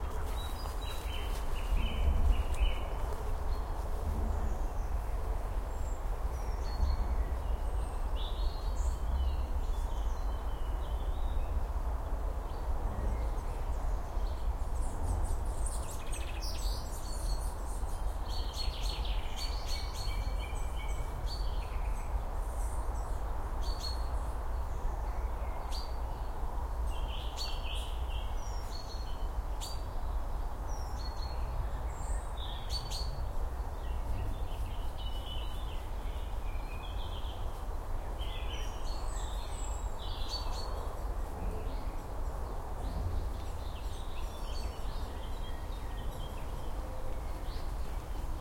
Recorded May 2013. Bird song & chatter in an English deciduous woodland. Distant road traffic is audible.